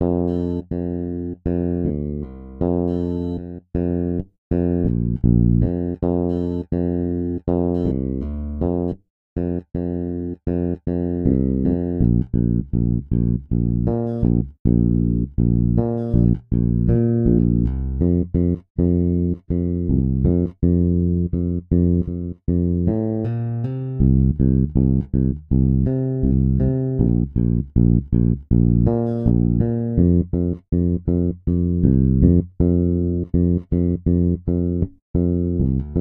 Song3 BASS Fa 4:4 80bpms
80, Bass, Chord, Fa, HearHear, beat, blues, bpm, loop, rythm